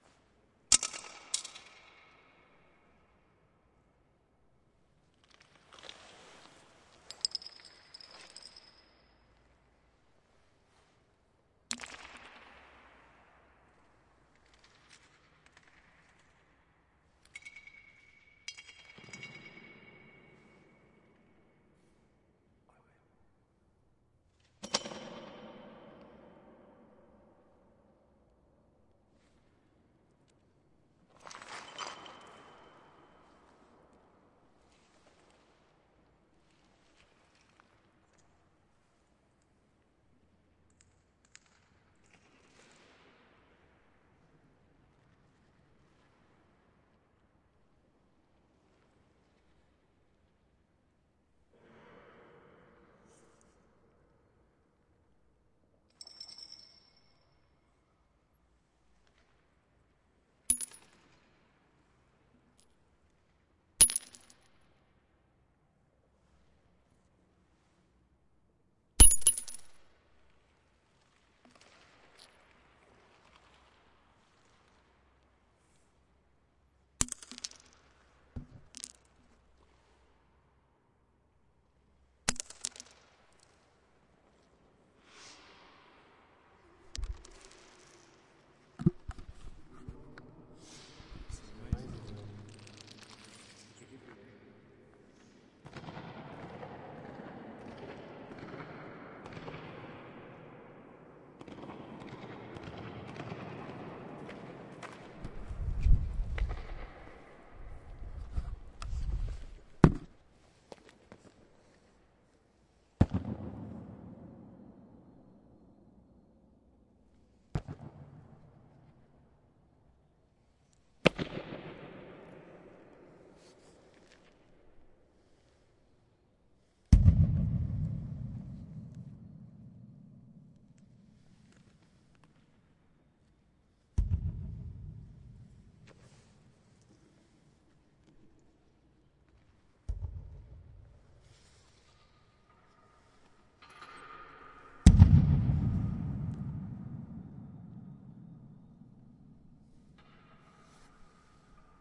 teufelsberg dome 4
recording made inside a disused radar dome at a derelict cold-war radio station in berlin, germany.
various knocks, claps and hits were made in the space to create interesting echo and reverb effects.
trimmed sections of this recording make interesting source files for convolution reverbs.
echo, bang, reverb, space, field-recording